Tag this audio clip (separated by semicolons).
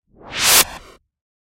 click; game; swish; user-interface; videogam; woosh